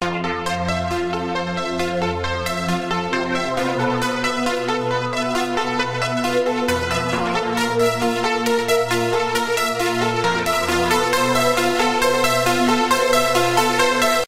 key loop 135
electronic, loop, riff, synth, hook